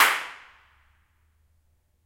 Clap at Two Church 4
Clapping in echoey spots to map the reverb. This means you can use it make your own convolution reverbs